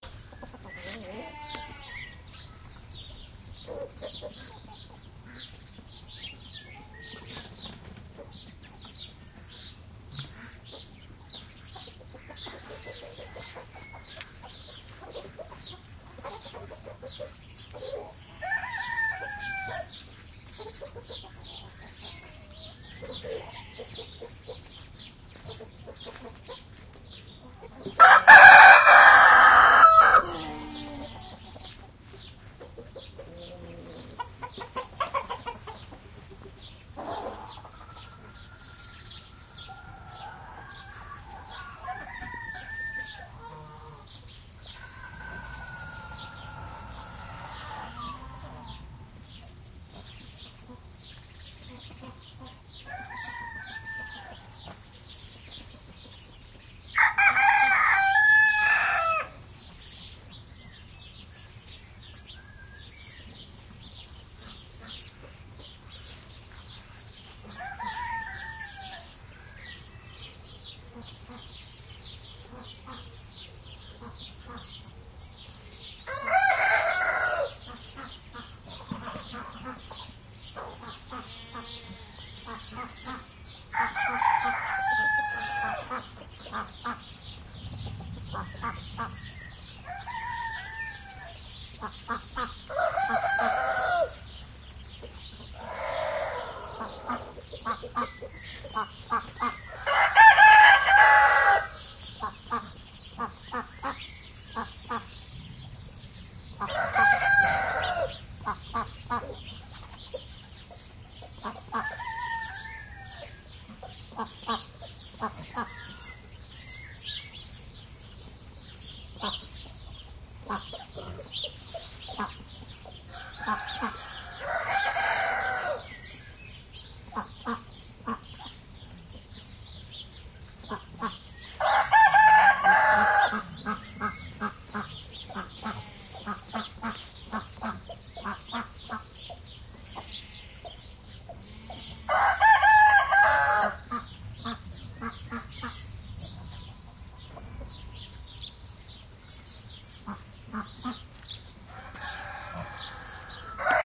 farm rooster duck birds 210410 0063
recording at a farm with lots of animals